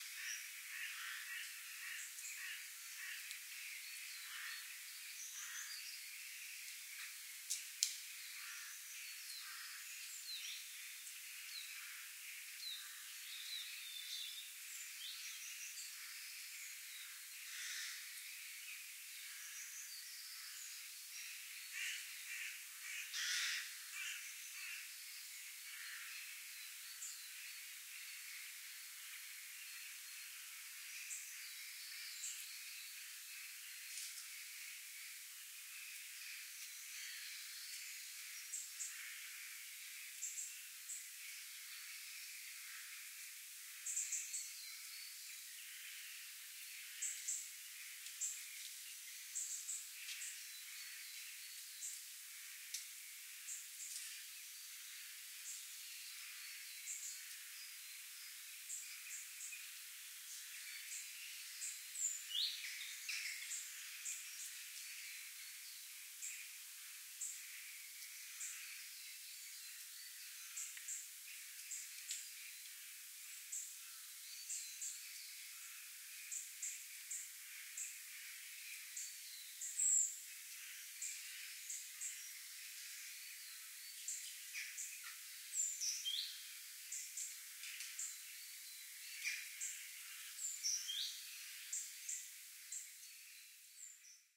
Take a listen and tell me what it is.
Birds In Light Rain Ambience (Scotland)
Birds In Light Rain Ambience. Recorded in Scotland.
ambiance, ambience, ambient, atmosphere, background, background-sound, bird, birds, field-recording, nature, noise, rain, raining, soundscape, summer